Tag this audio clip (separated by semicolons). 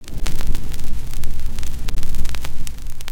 record; distortion